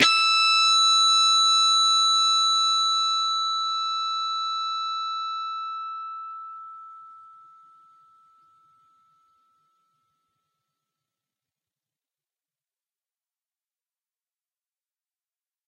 Dist sng E 1st str 5th frt Hrm
E (1st) string, 5th fret harmonic.
strings, guitar-notes, distortion, distorted-guitar, guitar, distorted, single, single-notes